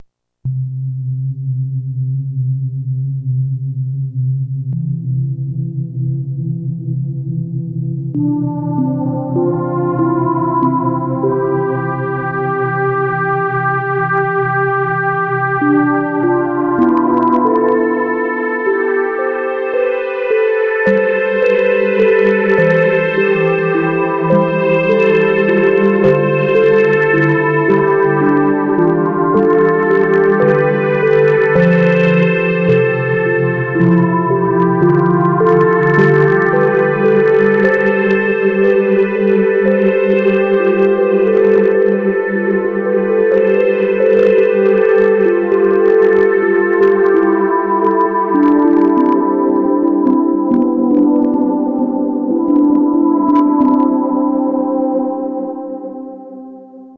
Kids Setting
Hey, Haggled a pawn shop owner into selling me an Alesis Micron for 125$ hehe, I have some sex appeal baby. *blush*
These some IDM samples I pulled off of it by playing with the synth setting, They have went through no mastering and are rather large files, So or that I am sorry, Thanks!
soundscape, canada, idm, ambient, sfx, alesis